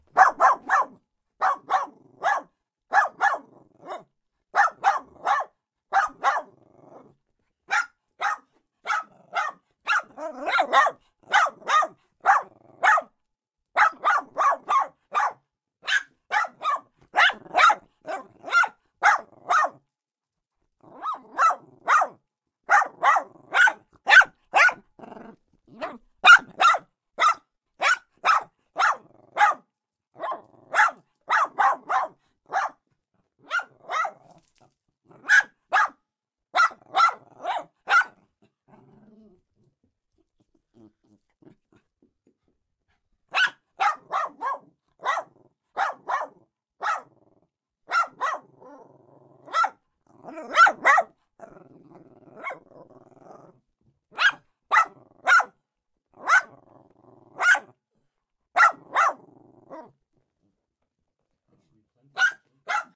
One of our small dogs barking in my home studio--recorded with AKG C391 microphone via digital mixer into Adobe Auditon